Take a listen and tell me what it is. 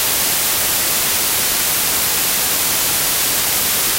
Korg MS20 White Noise
Just plain noise. Use this to modulate analog gear or similar.
korg, noise